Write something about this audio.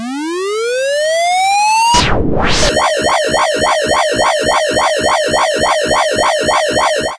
RIGAUD Matthieu 2015 2016 MagicRay
When a superhero or a wizard wants to launch a powerful attack, he uses a Magic Ray like this. First, he prepares his attack that will grow fast, and when it is ready to go, the laser will be launched in a big wave.
Sound made entirely with Audacity. The second part can be repeated in a loop.
Production steps :
-generate shirp : waveform square, frequency start 220 end 980, duration 2 seconds and amplitude start 0.2 end 0.8 on Track 1
- Effect Amplify : amplification : -7,5 on Track 1
- Create another track (Track 2), generate tone : waveform square, frequency 220, duration 6 seconds, amplitude 0.2
- Phaser on Track 2 :
Stages : 24
Dry/Wet : 185
LFO Frequency (Hz) : 2,8
LFO Start Phase (deg.) : 300
Depth : 190
Feedback (%) : 30
-Change Pitch of Track 2 from 219,403 to 492,543
-Change Speed of Track 2 : Percent Change 25,000
- Place the content of Track 2 following the rest of Track 1 but keep a gap of more or less 0.5 seconds between the two contents.
- Delete Track 2
magic, special, ray, wave, superheroes, fantasy, manga, attack, effect